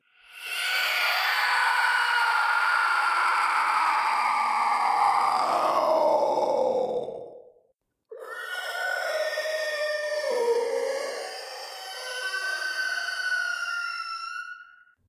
High Quality Monster Screech
beast, bellow, Creature, evil, Growl, horror, Monster, Roar, scream, screech, wendigo